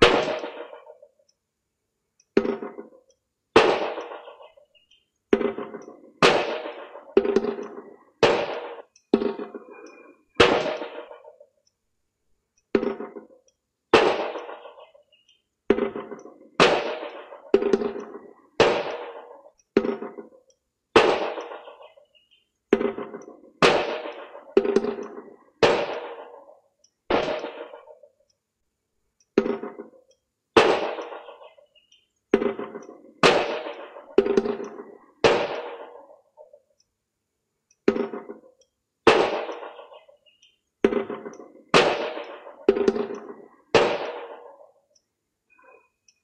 natural delay percussion
This is percussion in an empty swimming pool in Essen, Germany. The empty pool gives it a natural 3-time delay. Recorded with a Grundig tape-recorder about 1987.
Today (in 2018) the swimming-pool is filled up with sand and is part of a beach-bar